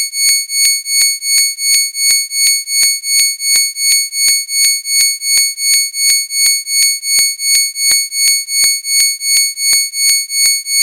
high piched alarm
Very annoying high pitched alarm.
This is a one of the results of my attempt to complete a school assignment.
We were supposed to create an alarm clock or a ring tone with AudioGen synthesizer.
I went with as much annoying sound as I managed to produce.
alarm, annoying, high-pitch, siren, synthesize